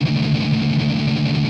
dis muted D2 guitar
Recording of muted strumming on power chord D second octave. On a les paul set to bridge pickup in drop D tuneing. With intended distortion. Recorded with Edirol DA2496 with Hi-z input.
160bpm, d, distortion, drop-d, guitar, les-paul, loop, muted, power-chord, strumming